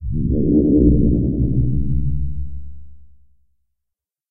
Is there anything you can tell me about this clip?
Low pitched "alien moan," FM synth with sweeping vocal formant filtering, vibrato. (MIDI 36) The "vibramoan" sounds can be used with a sampling synth.
alien, formant, horror, instrument, sci-fi, sound-effect, synth